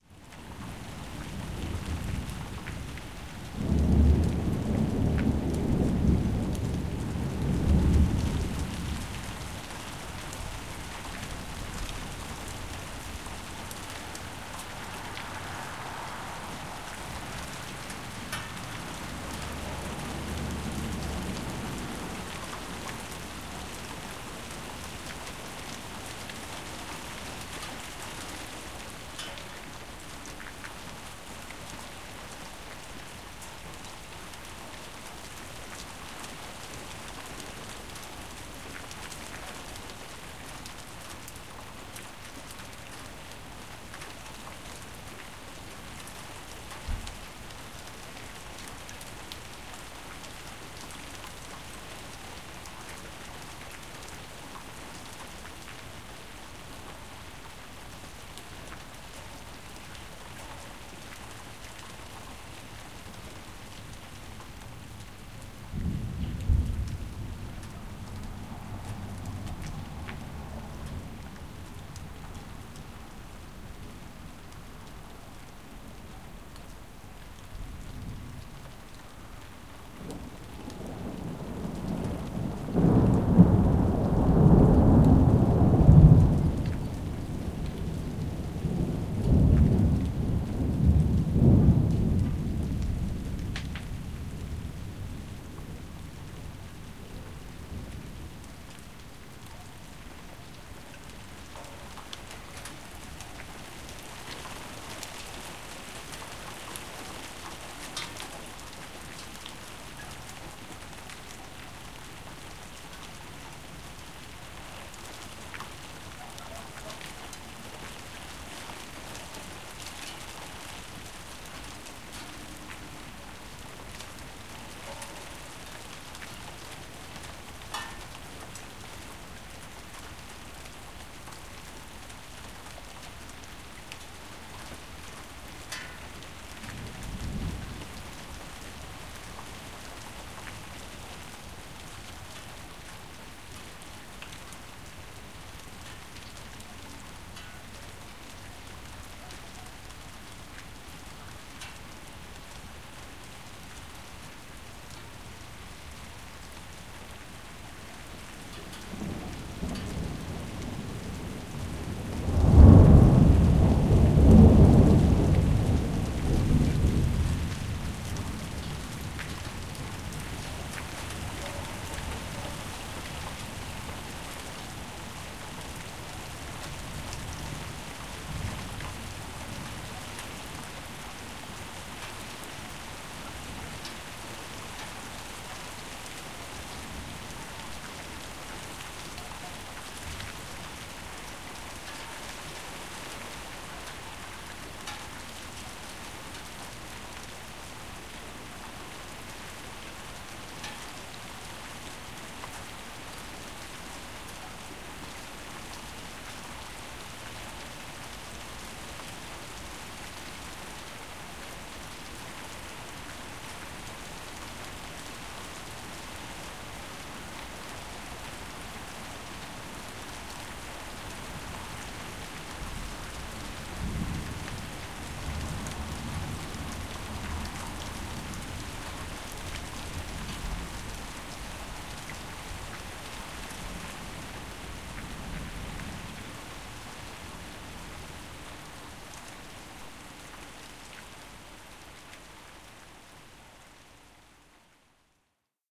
Afternoon thunderstorm in Rio Rancho, New Mexico. Rain, thunderclaps, and ambient noises (birds chirping, cars driving by, dogs barking) audible. Recorded using: Sony MZ-R700 MiniDisc Recorder, Sony ECM-MS907 Electret Condenser.
new-mexico, field-recording, rain, thunderstorm, thunder, ambient